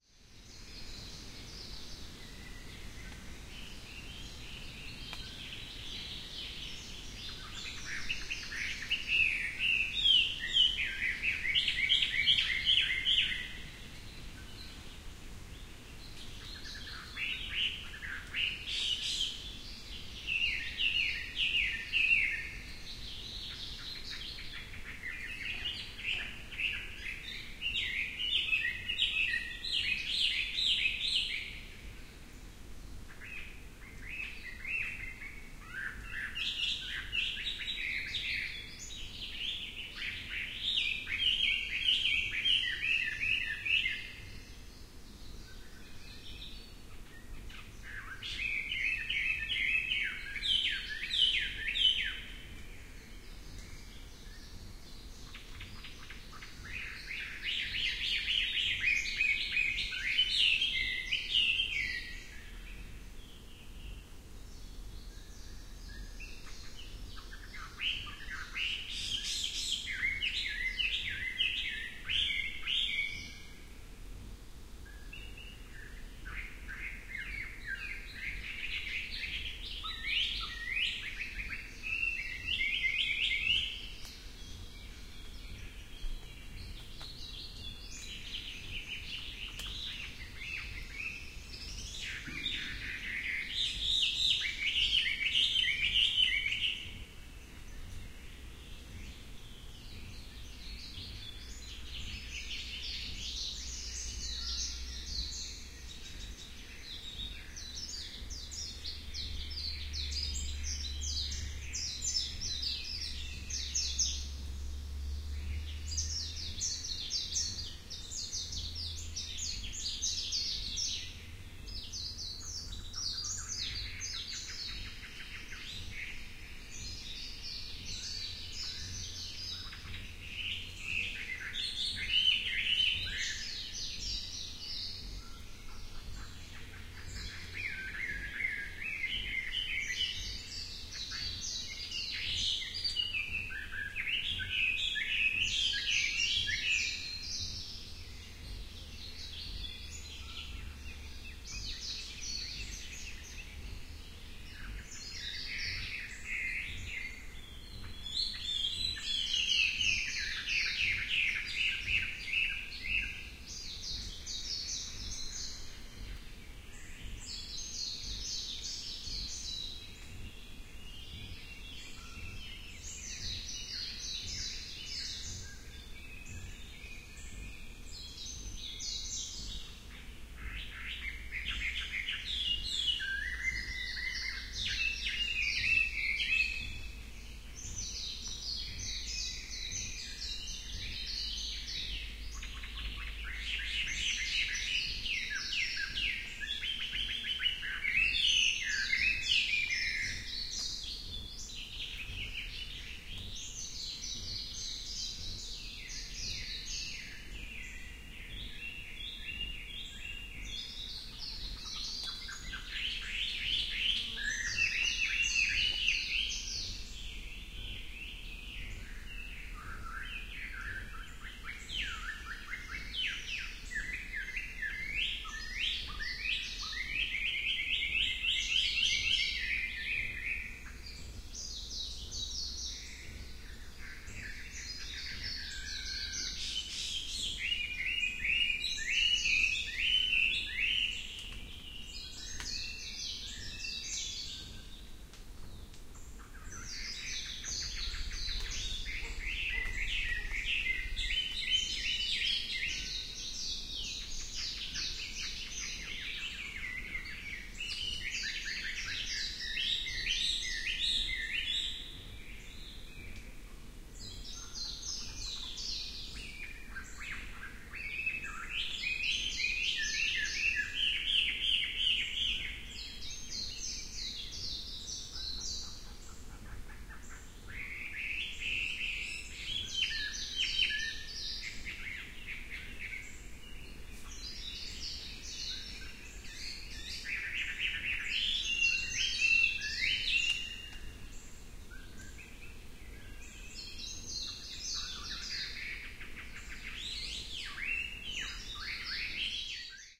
Birds in Kalopa State Park
Stereo ambient field recording of honeycreepers calling at mid-day in the koa foest in Kalopa State Park on the Big Island of Hawaii, made using an SASS. Occasional distant coqui frog calls.